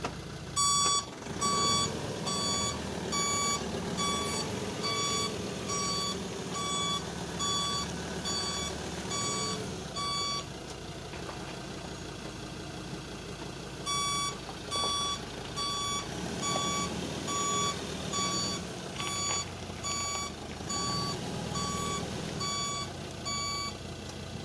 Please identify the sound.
camioneta retrocediendo sobre tierra / pickup truck backing up on the ground
camioneta Ford Ranger retrocediendo lentamente sobre tierra ligeramente húmeda
grabado con Xiaomi redmi 6 y Rec Forge II
Ford Ranger truck slowly backing up on slightly wet ground
recorded with Xiaomi redmi 6 and Rec Forge II
auto, automobile, car, dirt, drive, driving, engine, Ford, ground, motor, moving, Ranger, road, truck, vehicle, wet